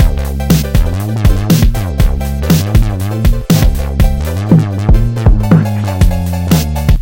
Frolic Loop
A fun up-beat loop designed for a video game. Made using a Kaossilator Pro.
fun, game, loop, music-loop, upbeat, video-game